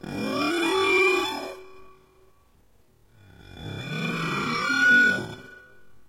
Washing a pyrex baking dish in soapy water, emphasizing the resonant qualities of fingers against wet glass. Recorded with a Zoom H2 in my kitchen. The recordings in this sound pack with X in the title were edited and processed to enhance their abstract qualities.
glass, pyrex, kitchen, baking-dish, percussion